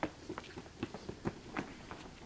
Running Two People Up Stairs
Two people run up a flight of stairs.
Running, Stairs, footsteps